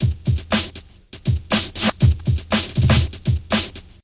Simple breakbeat loop.